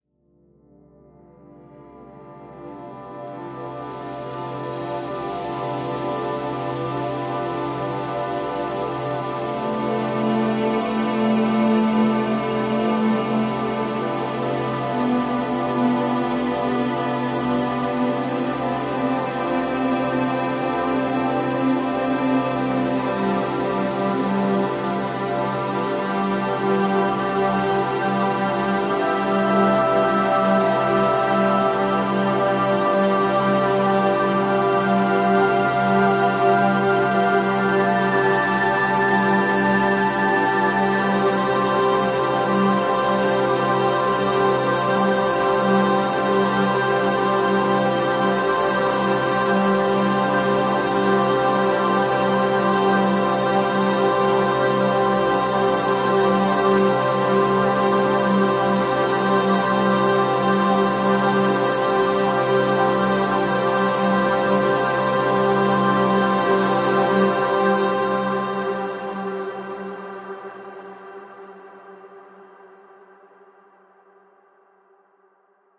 THE LONG HALL OF THE MACHINE MEN
Sunlight from the surface illuminating a vast space filled with machines.
Euphoric, Cinematic, Harmonic, Vocal, Intro, Pad, Expansive, Evolving